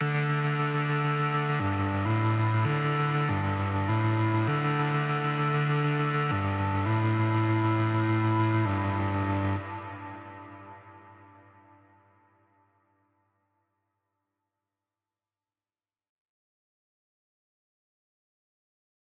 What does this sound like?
Low, pipe-like synth line released as part of a song pack
Electronic, Pipe, Synth